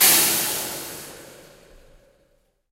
Metal fence hit long tail
Hitting a metal fence long tail
fence, hit, long, Metal, tail